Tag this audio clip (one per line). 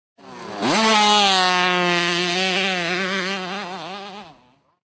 65cc
ktm
motorbike
motorcycle